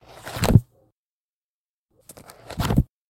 E2 closing the book
closing a book with many pages